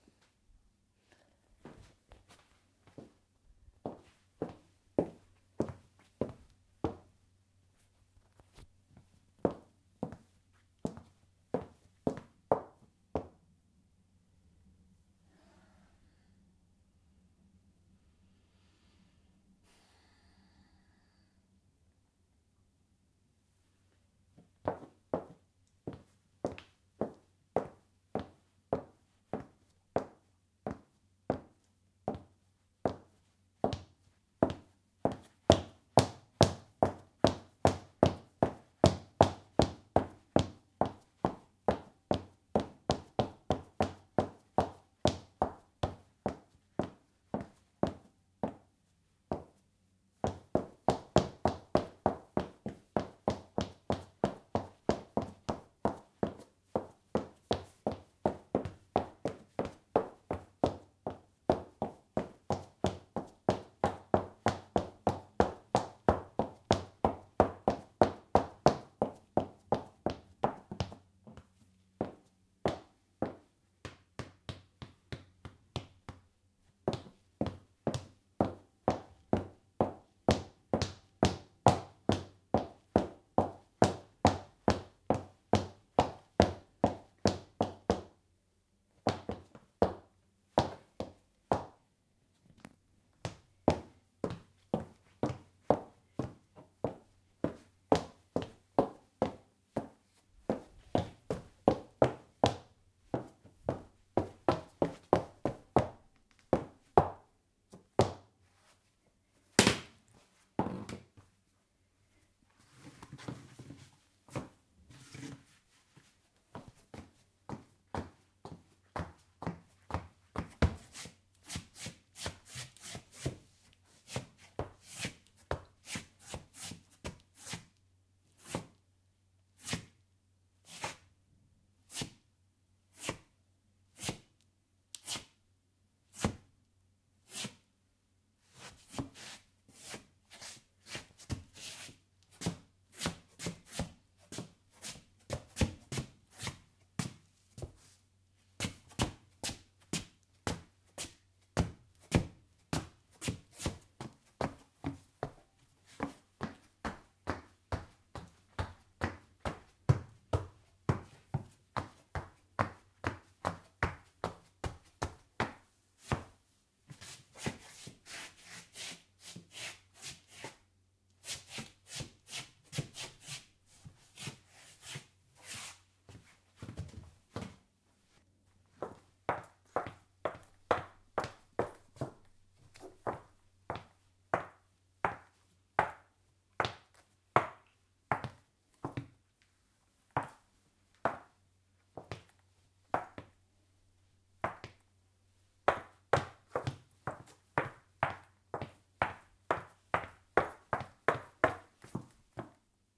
Various shoes and rhythms of walking, although they do sound somewhat artificial